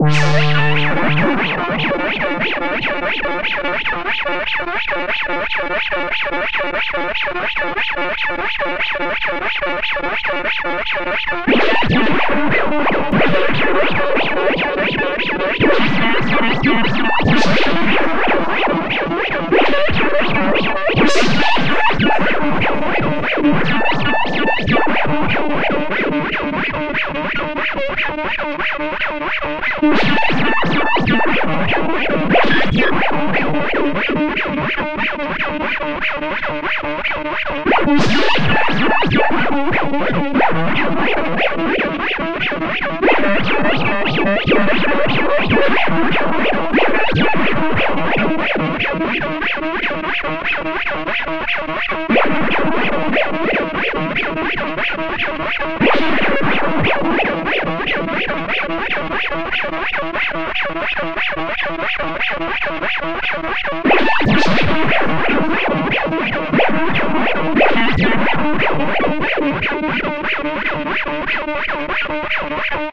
Bleeping 010 (97bpm) very unstable
Sounds created by audio-to-midi feedback loops in Ableton Live.
Quasi-musical sequence.
This sound was created at 97bpm (which affected the Arpegiator speed. Arpegiator step most likely set to 1/12). Please note the tempo of the sound itself may not be 97bpm.
This is an example of a very unstable feedback loop. The sound has a main creaking sequence which is sometimes experiences the addition of 'explosive' notes.
For a more detailed description refer to the sample pack description.
Software and plugins used:
Host: Ableton Live
audio-to-midi conversion: GuitarsynSE by Frettedsynth
main synth: Explor3r Extended by TheLowerRhythm (TLR)
Ableton Live built-in midi effects: Pitch, Scale and Arpegiator
If you want to know more why not send me a PM?
synthesis, feedback-system, The-Lower-Rhythm, GuitarsynSE, deterministic, automaton, TheLowerRhythm, chaotic, synth, audio-to-MIDI, quasi-music, Fretted-Synth, MIDI, MIDI-Effects, automated, VST, feedback, TLR